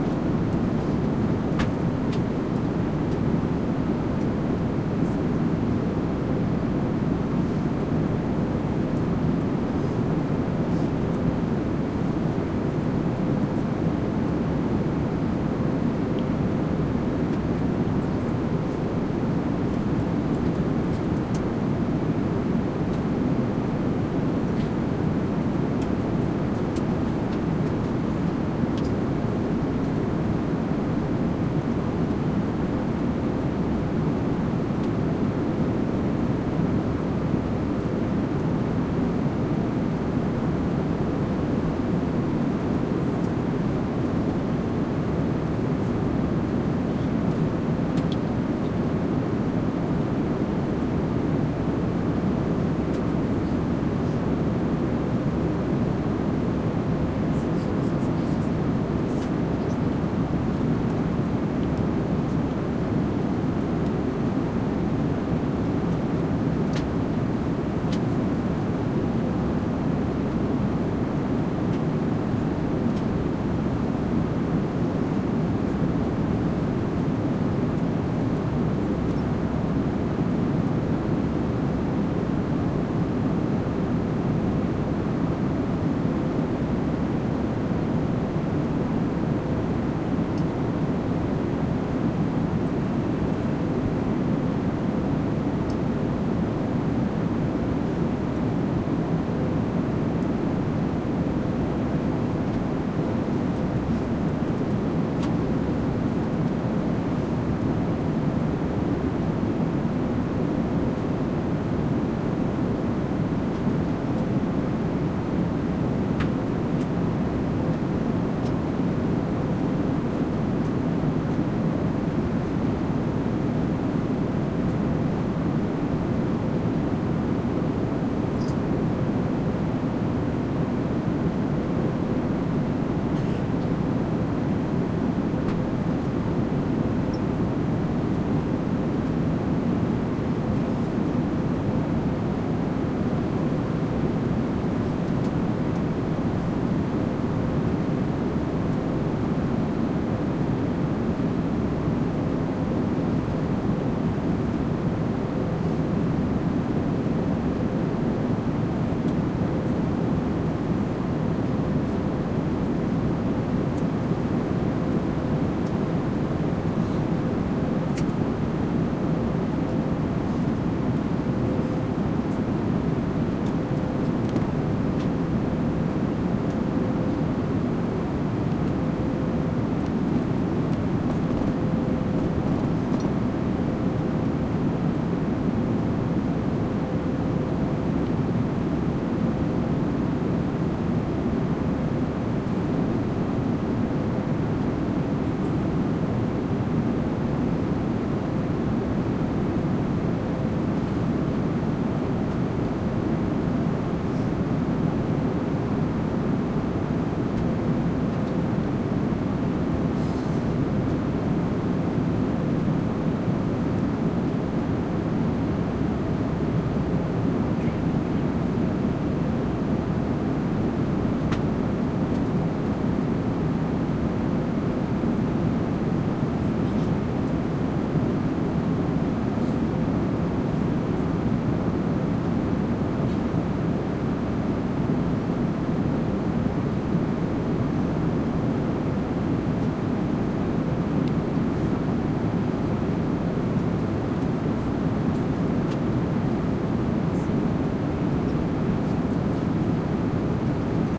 Atmosphere in the aircraft cabin.Please write in the comments where you used this sound. Thanks!